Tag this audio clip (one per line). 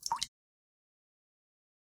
Dripping
Sea
Run
wave
blop
pour
Water
aqua
River
Drip
Slap
crash
Wet
Splash
pouring
Movie